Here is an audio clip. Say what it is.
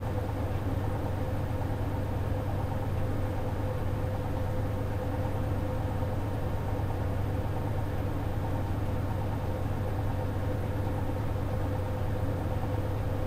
Gentle airconditioner and desktop computer sound in my bedroom
Microphone: Rode NT1000
Preamp: ART DPSII
Soundcard: RME Hammerfall Multiface
airconditioner, computer, fan, wind